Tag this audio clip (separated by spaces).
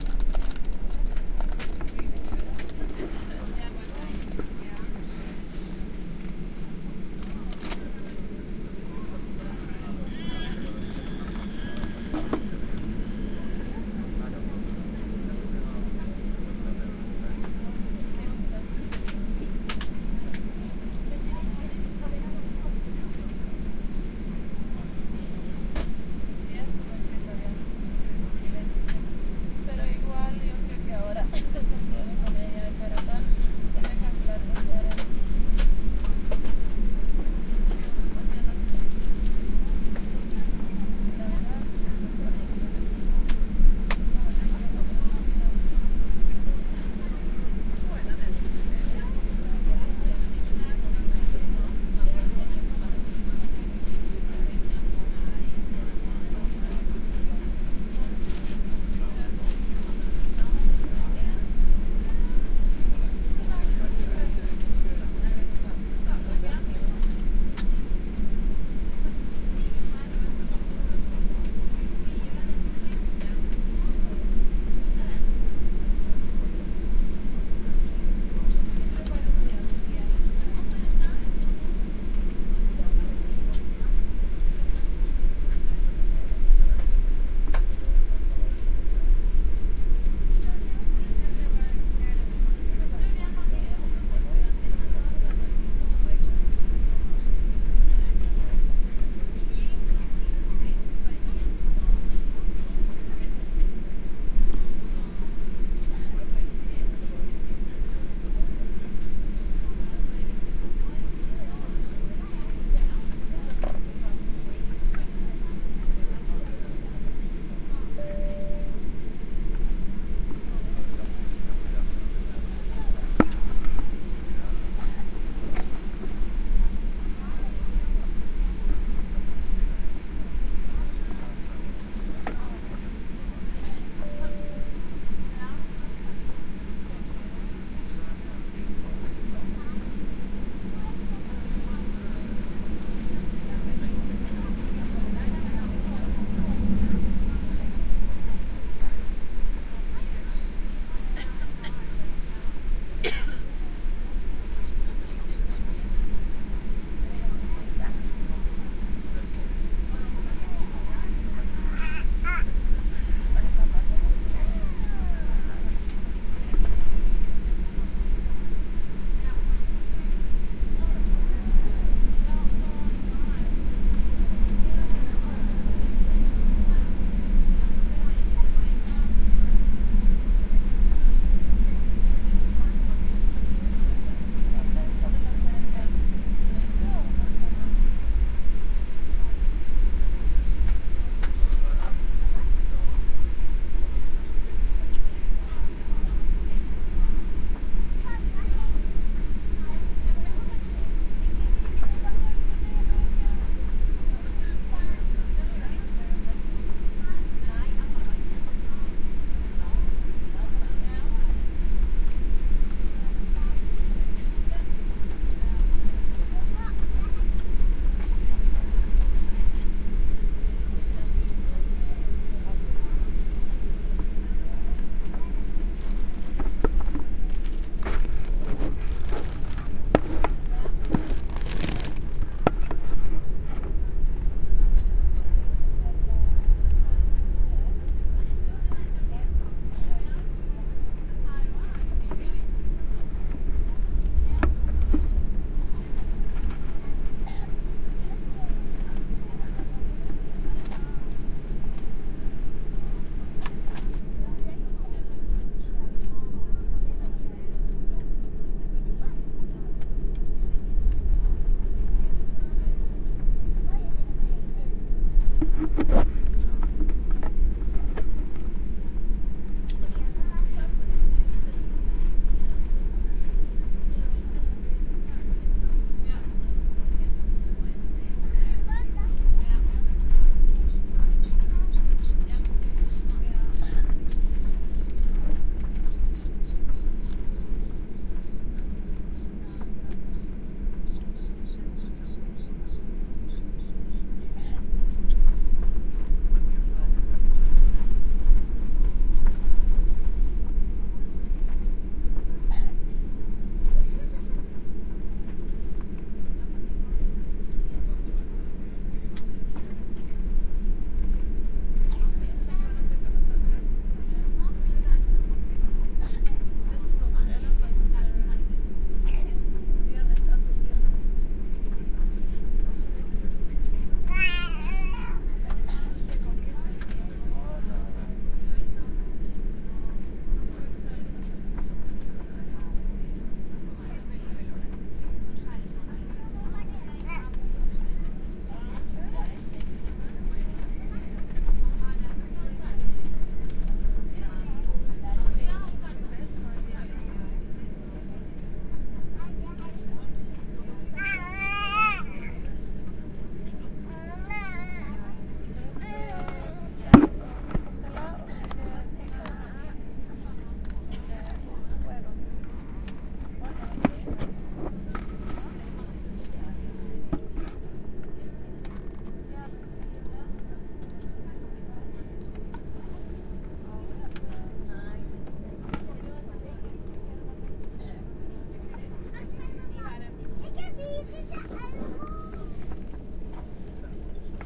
barcelona chatting food mercado talking people market